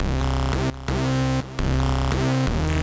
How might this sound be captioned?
Dreamer bass dist
drum n bass line with distortion.
beat, sequence, progression, phase, distorted, 170-bpm, synth, distortion, hard, bass, drum-n-bass